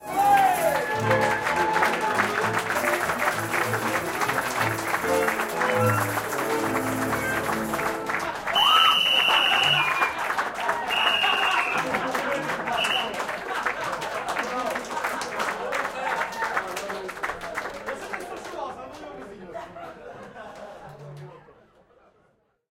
Applause during Budapest csárda folklore event.